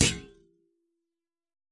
BS Hit 12
metallic effects using a bench vise fixed sawblade and some tools to hit, bend, manipulate.
Bounce, Clunk, Dash, Effect, Hit, Hits, Metal, Sawblade, Sound, Thud